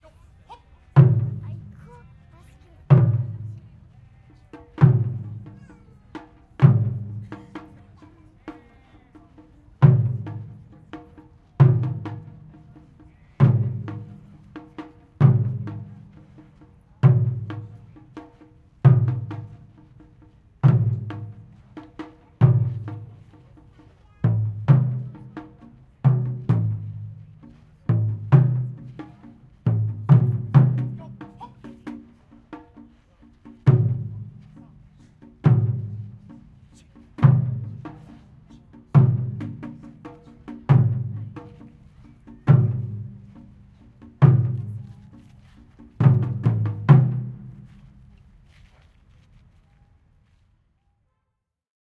190621 0378 FR JapaneseDrums
Japanese drummers in Paris (France).
This audio file is one of the recordings I made during the “fête de la musique 2019” (music festival) held in France every year on June 21st. Here, you can hear traditional Japanese drums played by members from the Paris Taiko Ensemble. In this recording, some of them are playing the drums while others are demonstrating martial art. Because they play outdoor (in a square of Paris), you can also hear voices from the people watching, and some sounds from the city around.
(If you like Japanese drums, please note that 8 additional recording made during this nice performance from the Paris Taiko Ensemble are available on my home page.
Recorded in June 2019 with an Olympus LS-P4 (internal microphones, TRESMIC system on).
Fade in/out and high pass filter at 140Hz -6dB/oct applied in audacity.
Japan, music-festival, ambience, Japanese, traditional, field-recording, street, Taiko, soundscape, France, fete-de-la-musique, drummers, atmosphere, Paris, drums